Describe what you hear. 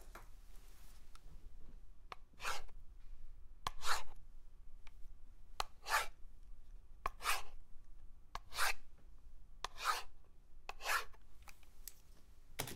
writing pen 2
Writing fast with a pen. recorded with Rode NT1000